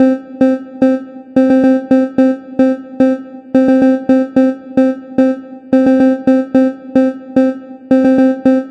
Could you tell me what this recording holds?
A member of the Delta loopset, consisting of a set of complementary synth loops. It is in the key of C major, following the chord progression C7-F7-C7-F7. It is four bars long at 110bpm. It is normalized.
110bpm, synth